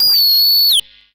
A gurgling beep from the Must Synth which ends with a kind of feedback/reverb-ish sound.